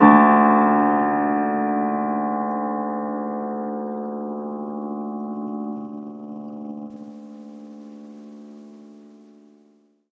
88 piano keys, long natural reverb: up to 13 seconds per note
THIS IS ME GIVING BACK
You guys saved my bacon back in the day. Recently I searched for free piano notes for a game I'm making, but the only ones I could find ended too quickly. I need long reverb! Luckily I have an old piano, so I made my own. So this is me giving back.
THIS IS AN OLD PIANO!!!
We had the piano tuned a year ago, but it is well over 60 years old, so be warned! These notes have character! If you want perfect tone, either edit them individually, generate something artificially, or buy a professional set. But if you want a piano with personality, this is for you. being an old piano, it only has 85 keys. So I created the highest 3 notes by speeding up previous notes, to make the modern standard 88 keys.
HOW THE NOTES WERE CREATED
The notes are created on an old (well over 50 years) Steinhoff upright piano. It only has 85 keys, so I faked the highest 3 keys by taking previous keys and changing their pitch.